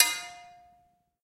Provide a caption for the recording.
One of a series of sounds recorded in the observatory on the isle of Erraid